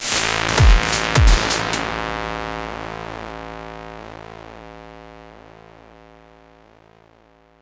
a example i whipped up with fl studio 10 to give a example of what it might sound like if it did happen.
music, war